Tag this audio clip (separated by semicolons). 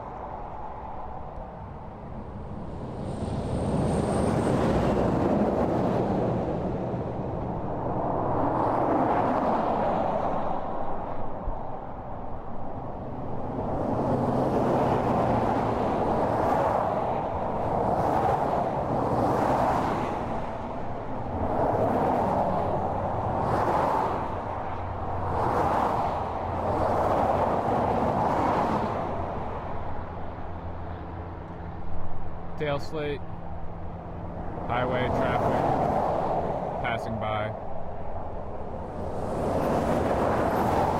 high; speed; Traffic; Highway